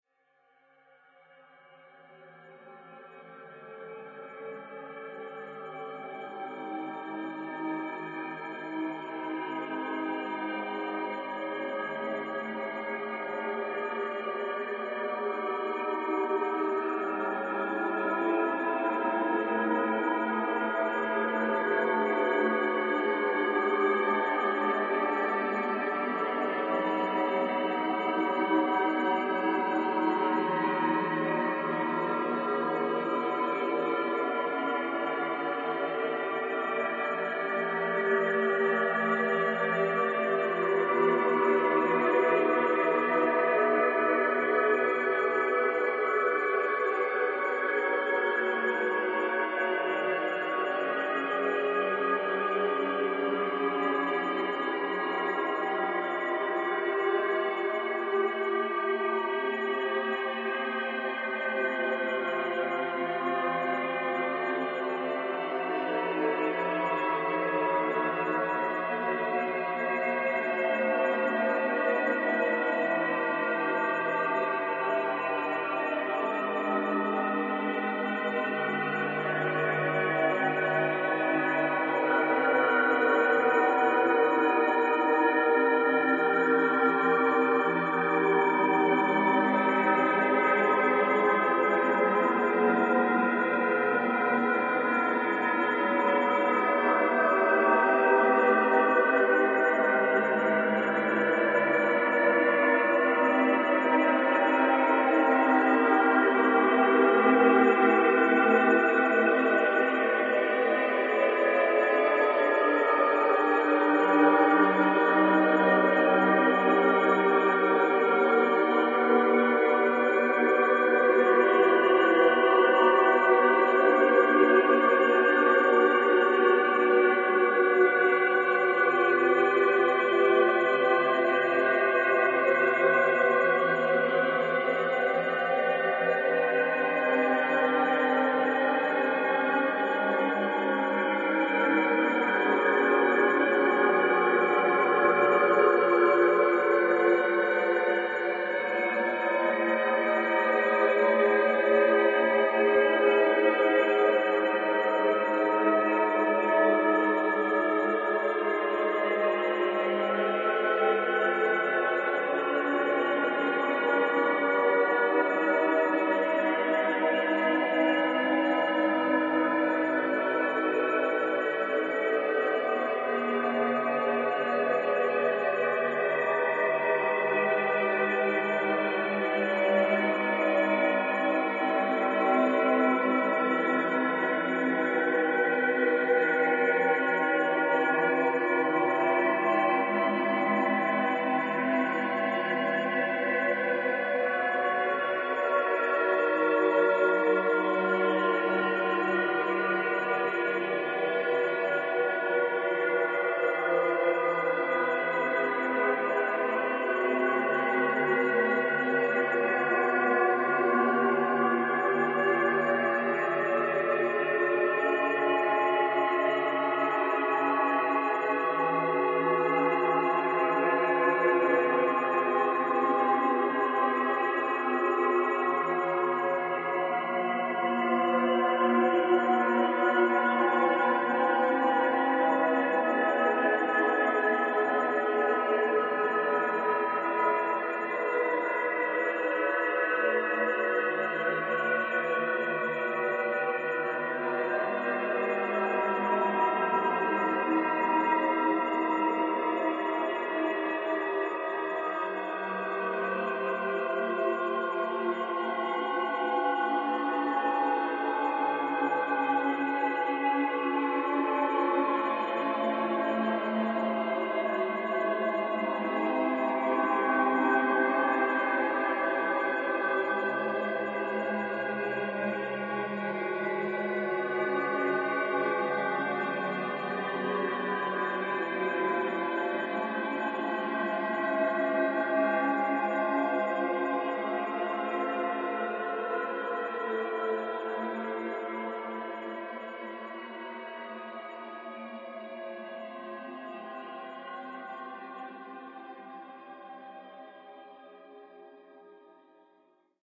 Wailing Souls
This audio sounds like wailing or howling souls. I would describe it as confusing, disharmonic, strange, mysterious, eerie, unsettling and dark.
Video/film seems to be the most obvious area of application—or further processing.
It was made by processing the noise of my microphone. Processing steps include
time stretching, filtering, EQing, applying reverb and others.
I would like to know and hear/see the results of what you've done with my sounds. So send me a link within a message or put it in a comment, if you like. Thank You!